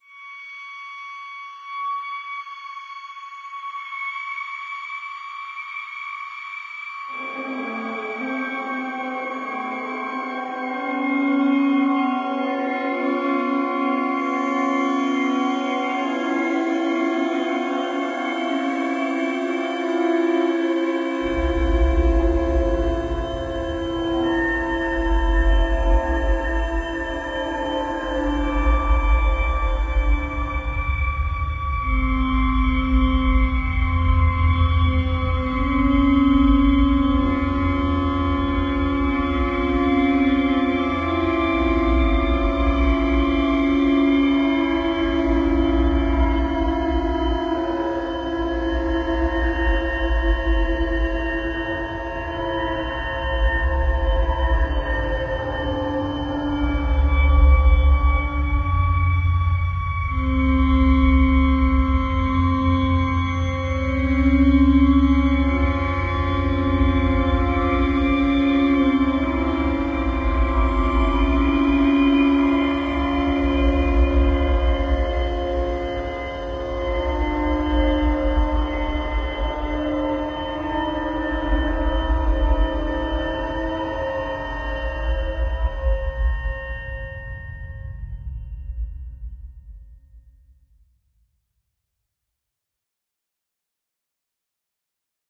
Nightmare Sequence
A short section of electronic music created in Logic with Sculpture soft synth, mastered yet fully dynamic and ready to go. Enjoy!
Brass, Creepy, Dissonant, Dream-sequence, Eerie, Headache, Horror, Nightmare, Pain, Pitch-Bend, Reverb, Scary, Semi-tone, sinister, Soft-Synth, Spooky, Strange, Strings, Sub, Subversive, Synthetic, Troubling, Uncomfortable